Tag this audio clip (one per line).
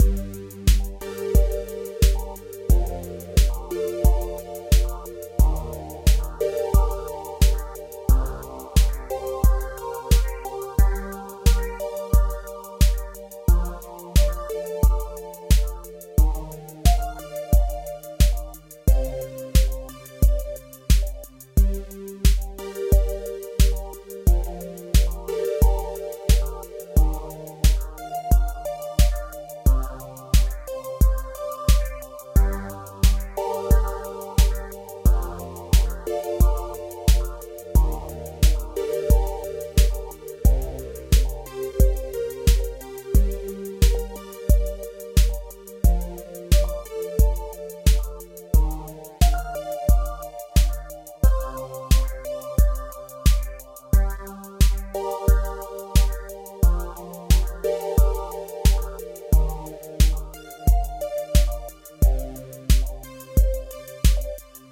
beat
dark
electro
electronic
experimental
loop
retro
synth